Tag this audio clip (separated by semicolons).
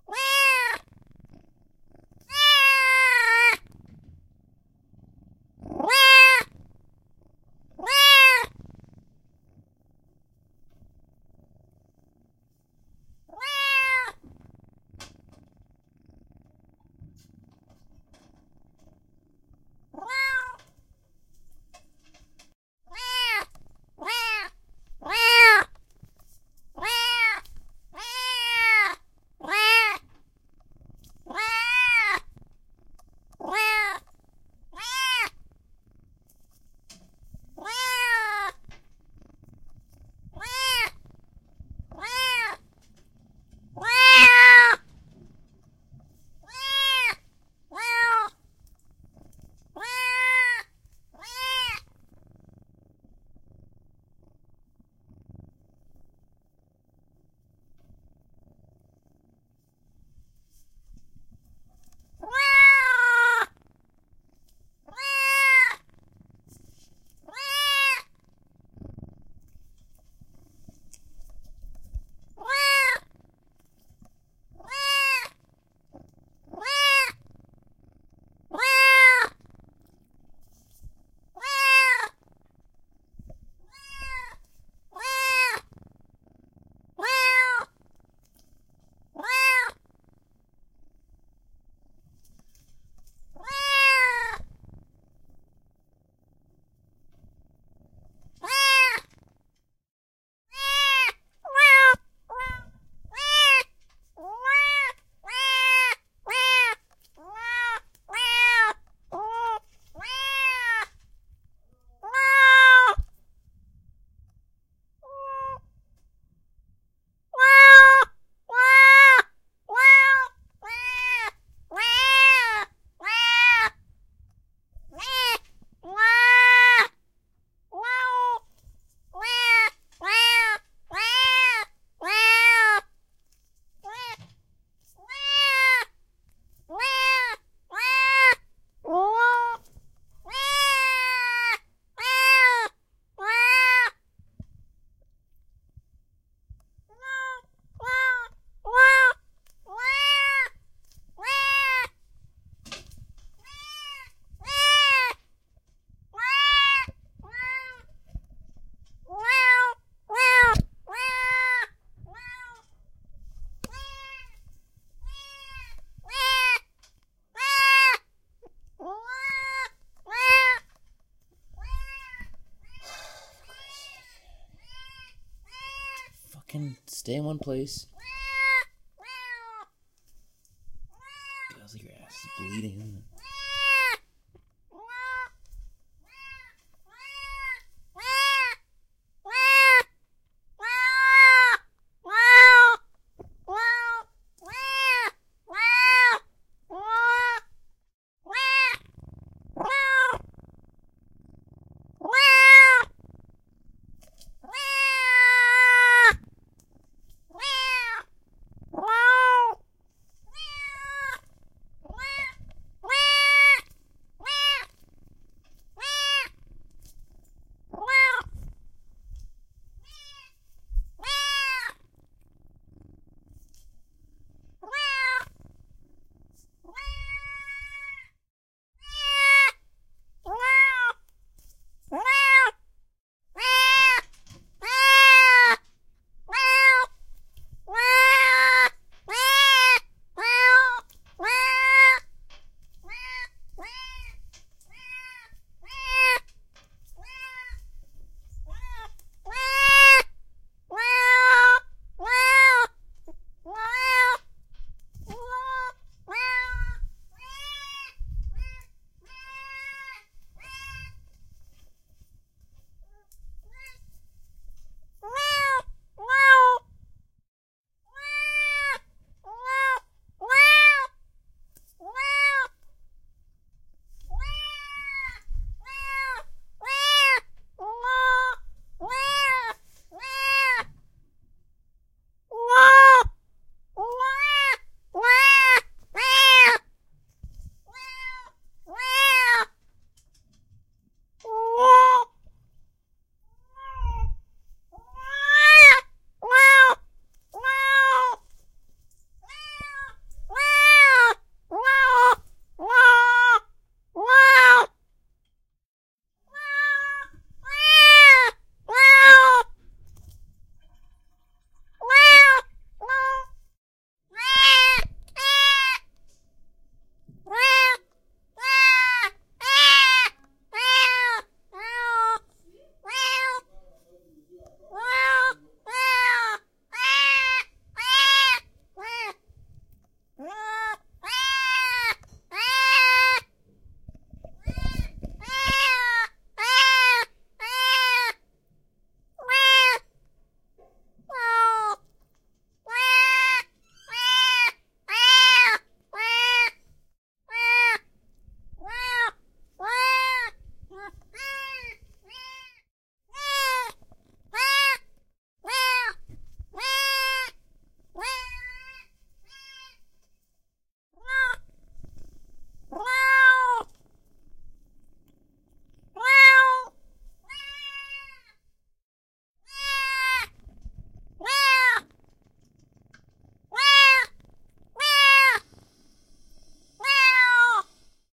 kitten; many; meows; various